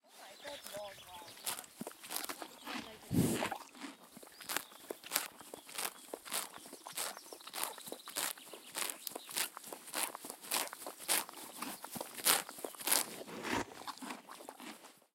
Horse Eating 2 4416
Horse eating grass in field. Recorded with a Tascam DR-40x
bite, biting, chew, chewing, crunch, crunchy, eat, eating, equestrian, grass, hay, horse, horses, munch